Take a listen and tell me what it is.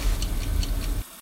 LEPROUX Jeanne 2018 2019 sons1
"SONS1" : Recorded sounds : birds noise, subway noise, water noise and o'clock noise : the subway noise and waternoise were less than others.
These sounds were recorded in the llifestyle, for example in the street, in the house...
internet, Elementary, type